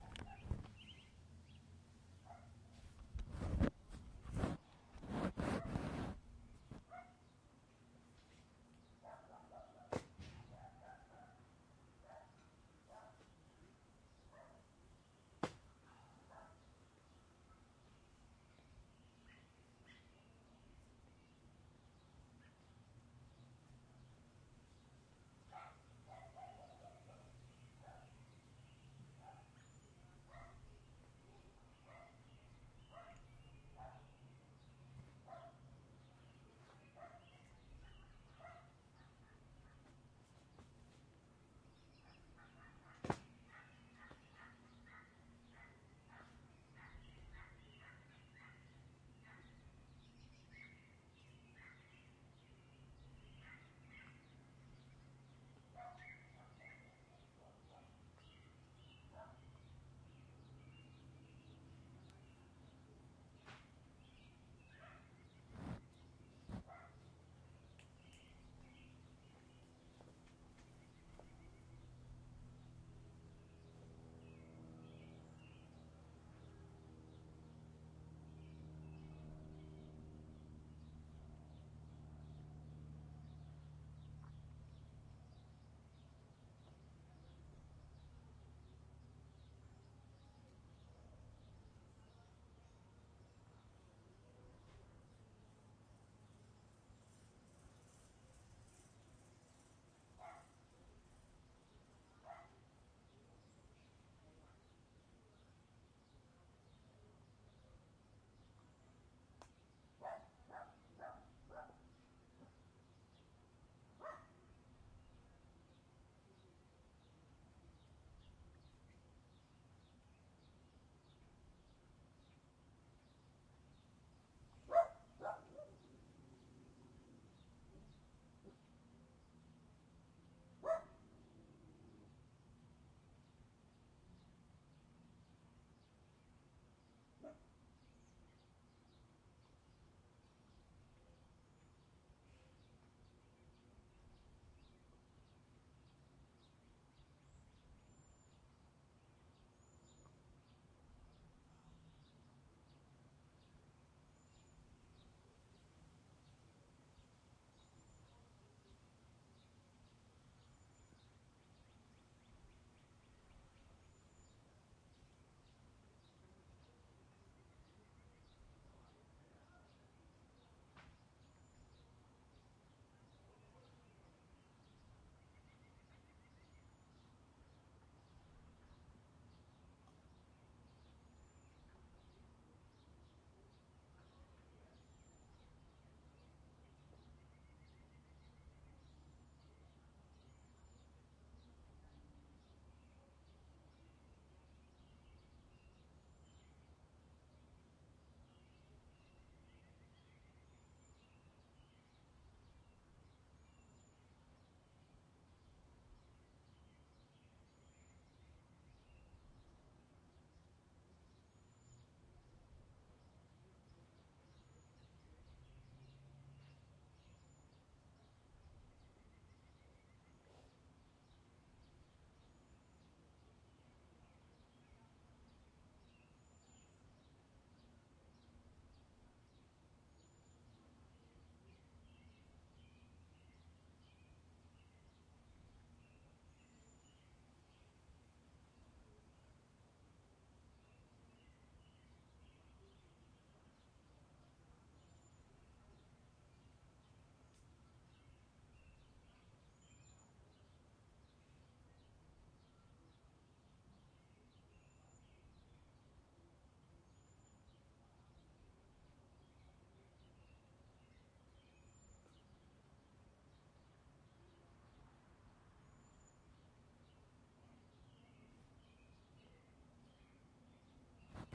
summertime outdoors
stereo recording of a park near my house during the early summertime days. recorded with zoom h4, 41100, 16. around 2:00 there is an excellent dog bark.
h4, chirping, bark, summertime, stereo, daytime, soundscape, zoom, birds